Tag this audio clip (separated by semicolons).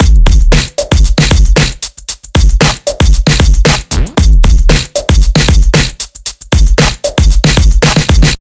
dance; breaks; big; funk; beat